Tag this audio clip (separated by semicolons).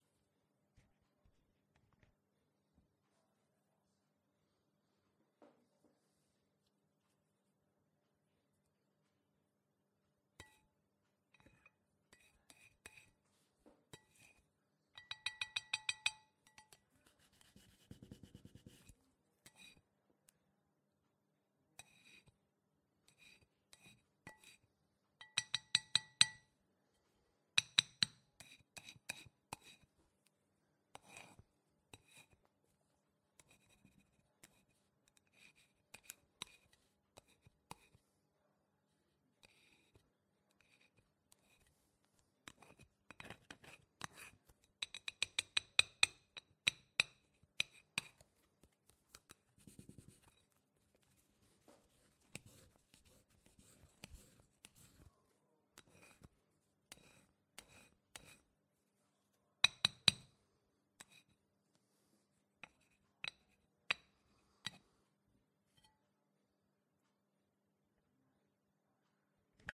foley; line; drug; plate; cocaine; use; drugs; racking; up; hot; coke; sniff